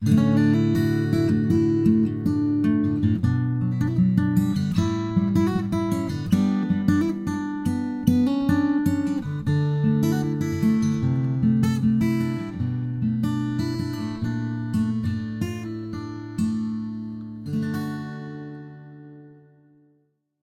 Guitar playing
I love good sound.
chords, guitar, instrument, music, rhythm-guitar, strings